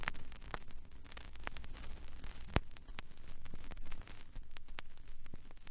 In an attempt to add warmth to my productions, I sampled some of the more distinctive sounds mostly from the lead-ins and lead-outs from dirty/scratched records.
If shortened, they make for interesting _analog_ glitch noises.
warm, hiss, dust, noise, crackle, vinyl, warmth, turntable, record, static